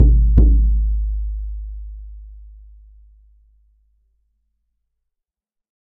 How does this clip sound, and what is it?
NATIVE DRUM DOUBLE STRIKE 02
This sample pack contains 9 short samples of a native north American hand drum of the kind used in a pow-wow gathering. There are four double strikes and five quadruple strikes. Source was captured with a Josephson C617 through NPNG preamp and Frontier Design Group converters into Pro Tools. Final edit in Cool Edit Pro.
aboriginal, drum, ethnic, first-nations, hand, indian, indigenous, native, north-american, percussion